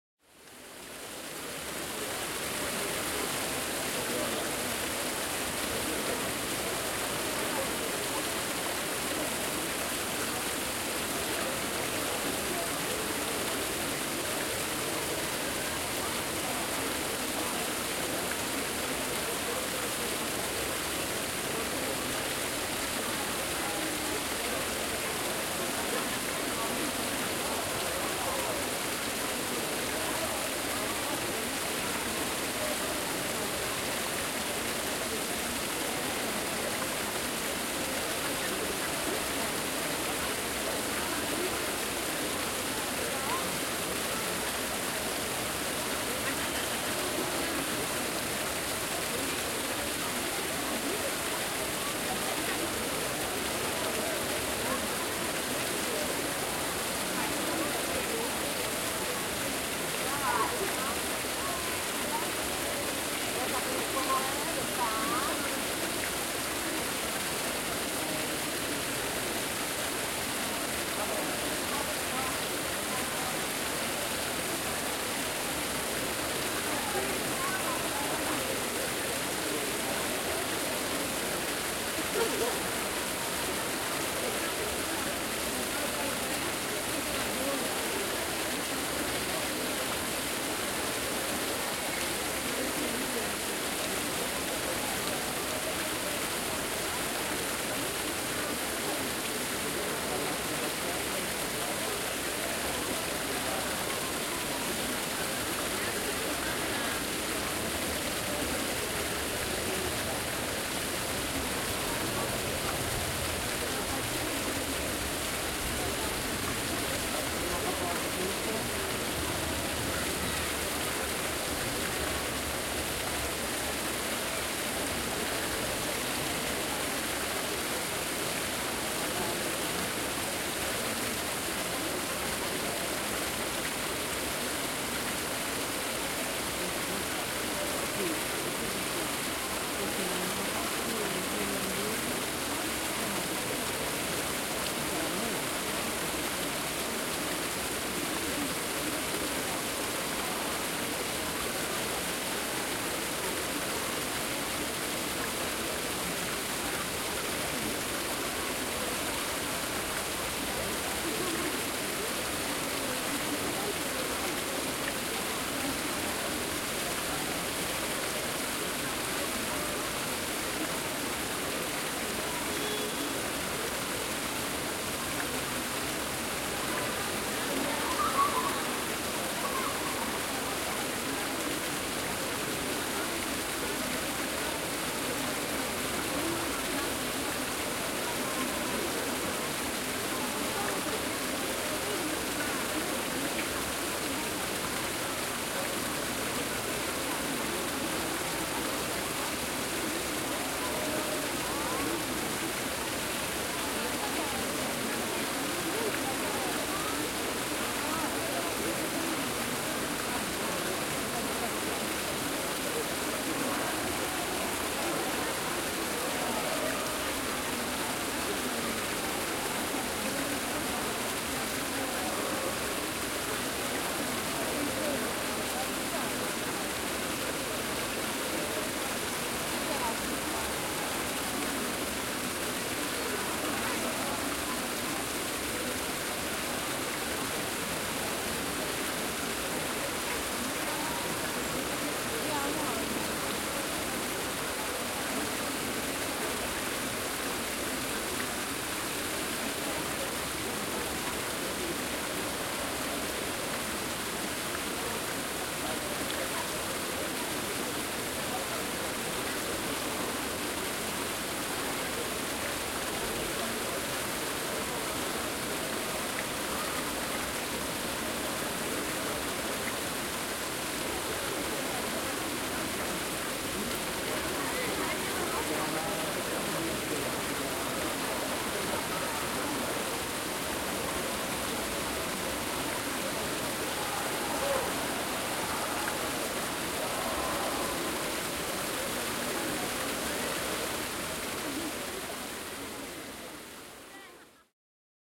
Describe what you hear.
04 Roma, Fontana di Trevi , water, crowd Selection
Roma, Fontana di Trevi , water, crowd.
27/03/2016 4:00 pm
Tascam DR-40, AB convergent.